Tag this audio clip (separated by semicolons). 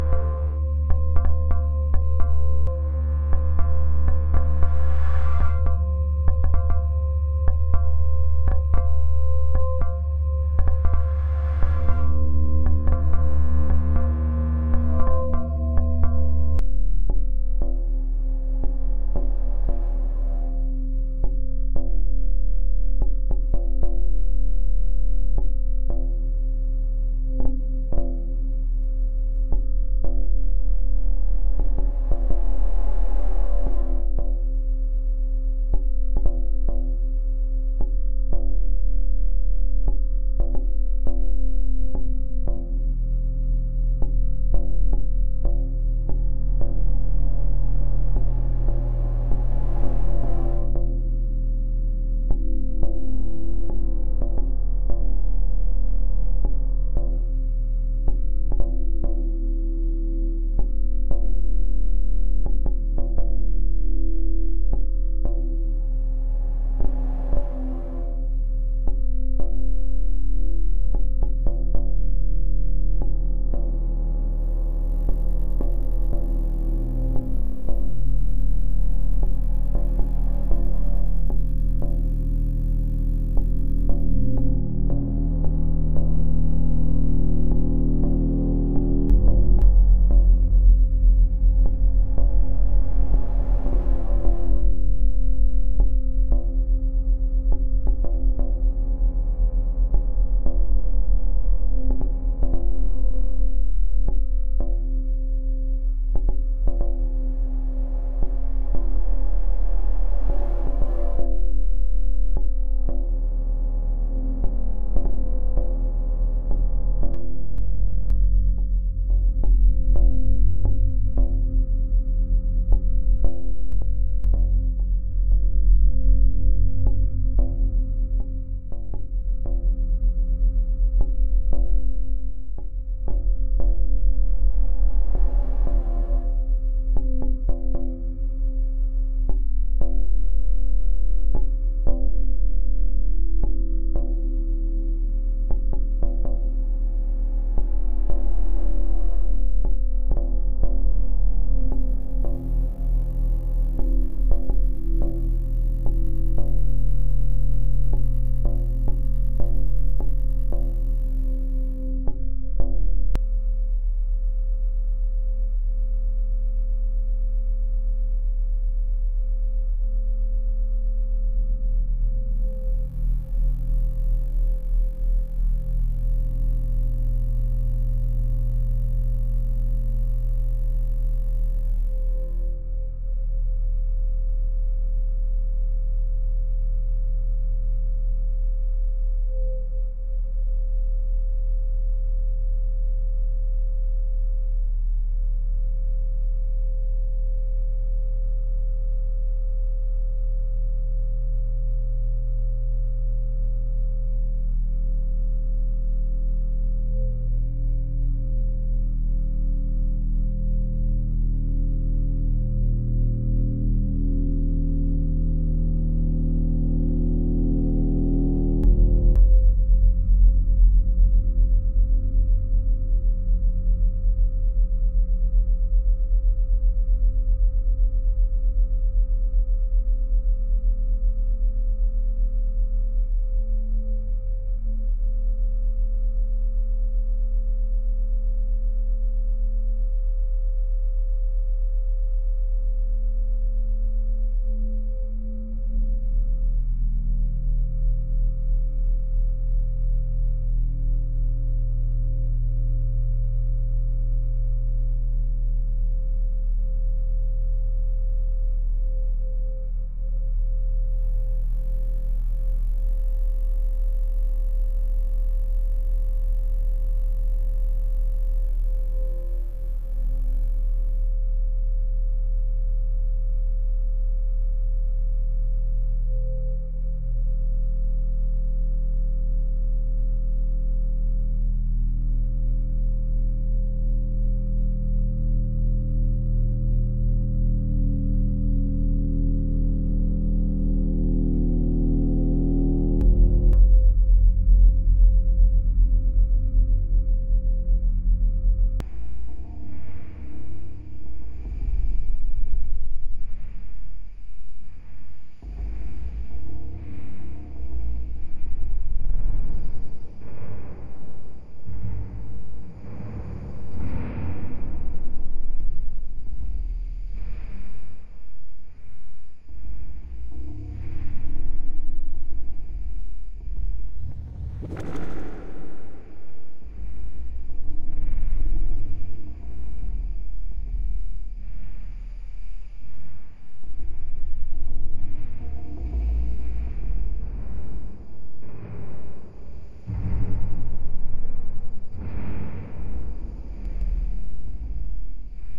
atmosphere; soundscape; ambience